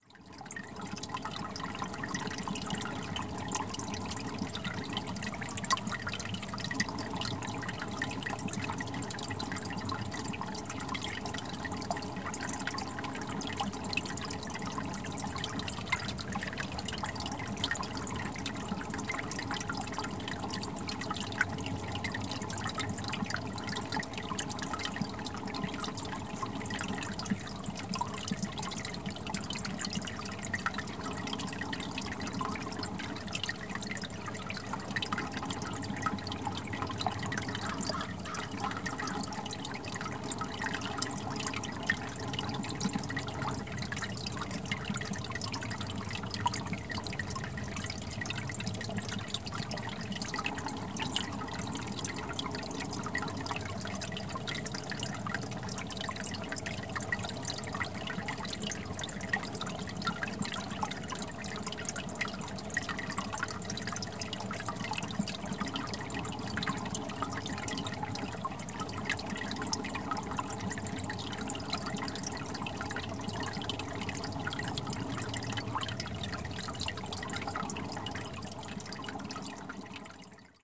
A recording made of a icy stream at a point where the water was actually running underneath a thin mantle of ice.
Made on February 2nd, 2014.
Equipment used: Zoom H4N using the internal built-in microphones.